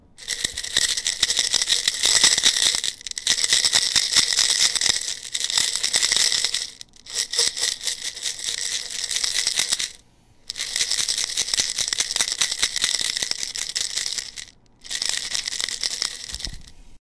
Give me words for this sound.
Coins jar; glass jar with coins; near